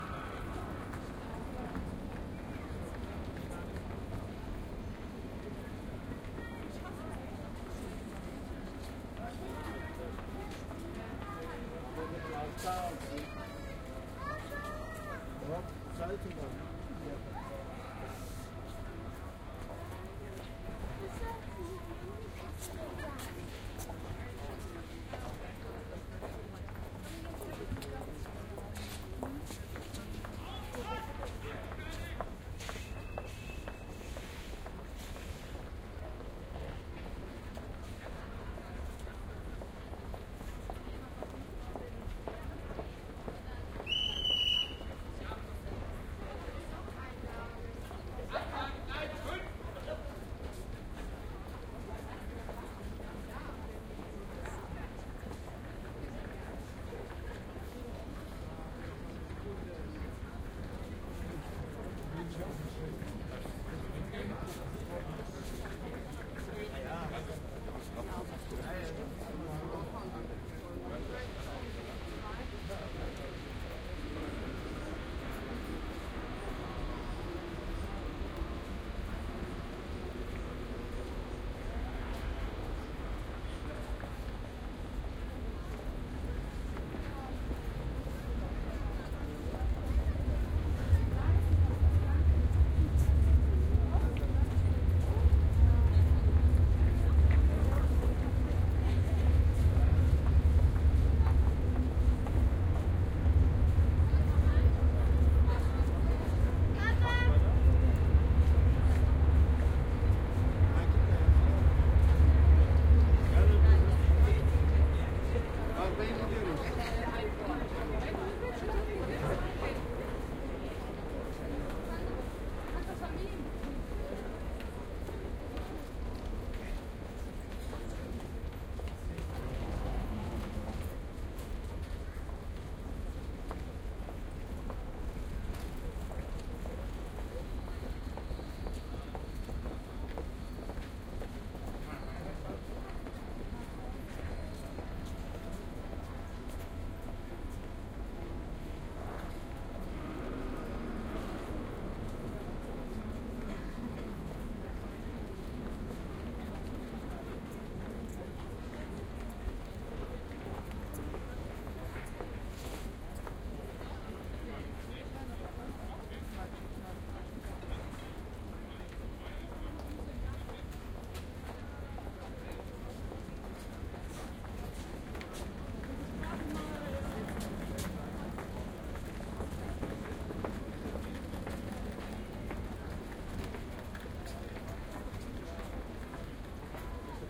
Cologne station
Here are a few minutes of the trainstation in Cologne.
I recorded this in an aera, where all those shops and fastfood places are, but you can still hear a few "railway" things.
R-09 HR recorder, Soundman OKM microphones with A 3 adapter.